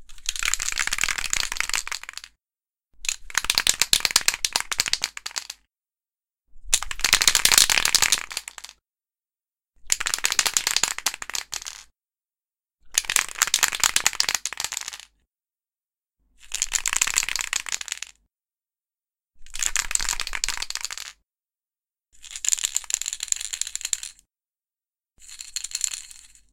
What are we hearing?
Spray Paint Shake
Multiple shaking spray paint can with rattle
spray-can, paint, can, spray, shake, shaking, aerosol, SprayPaint, rattle, spraycan